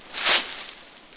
Rustling a small pile of leaves with my foot.